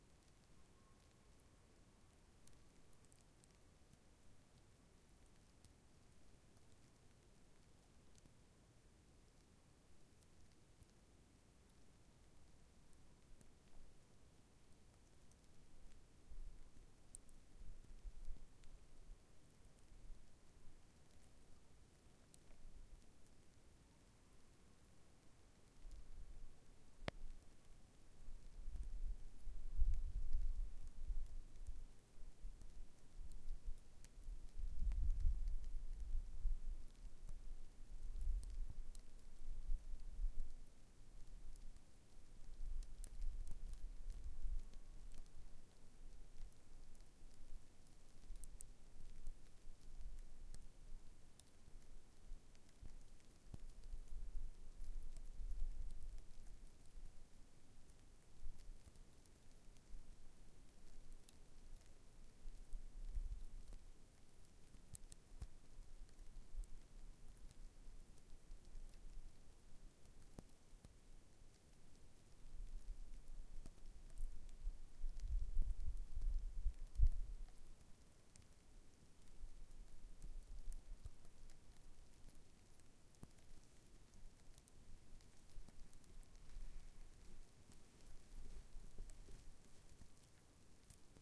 fallingsnow windFRONTLR
Front Pair of quad H2 winter. Close proximity to freezing snow.